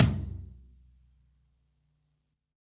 Low Tom Of God Wet 004
drum, realistic, drumset, tom, set, low, kit, pack